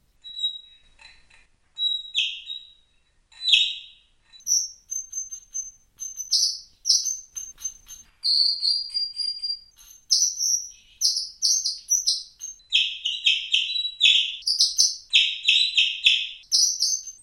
Question is: Genuine or ambient? The record will be more interesting if I say it's up to you to decide. Quite a good singer.